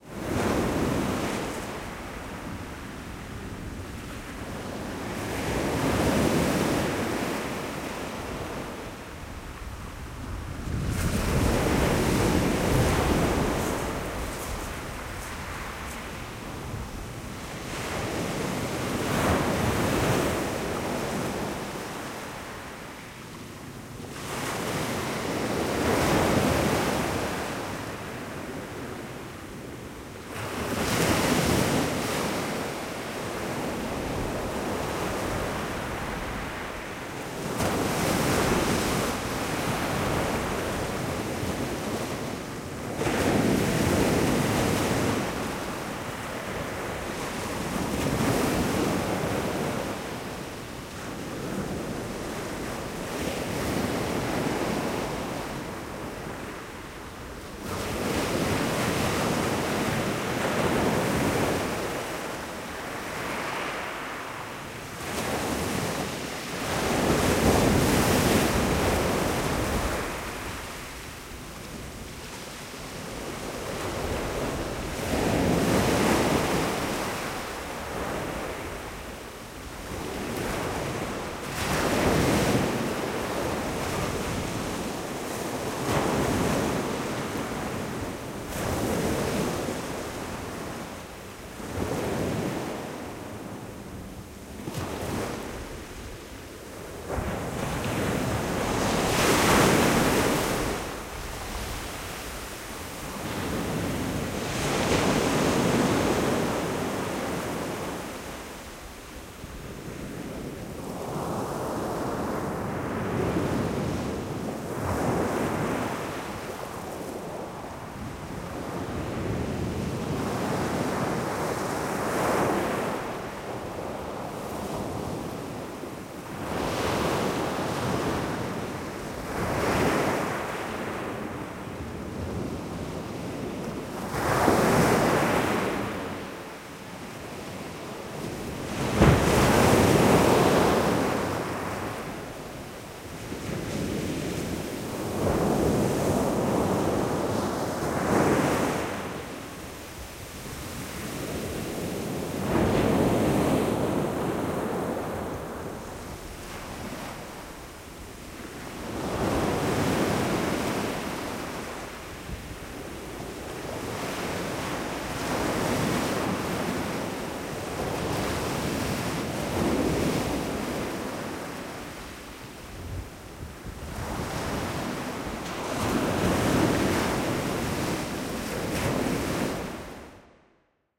Felixstowe beach waves close shotgun 3min stereo reverb
A longer recording of waves breaking on Felixstowe Beach in Suffolk, England. Recorded using a mono shotgun mic, external pre-amp and Zoom H4. Recorded close to the water to try and capture the spray from the waves. Wind shield was used but a little bit of wind exists on the recording with a HPF used to minimise rumble. A little bit of stereo reverb has been added to give a bit of width to the mono recording.
Beach, England, Felixstowe, Field-Recording, nature, north-sea, Ocean, Sea, spray, Stereo, Suffolk, Summer, Water, Waves, wind